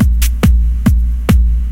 TECHNO LOOP PGV STYLEEE
less successful attempt of pounding grooves beat. re pitch kick and layer sounds eq to bring out the sub end and compress heavily to make the bass roll along a bit.
loop, techno